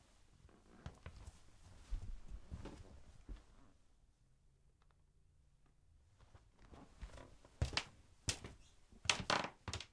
footsteps inside old house
floor, foot, footsteps, inside, steps, walk, walking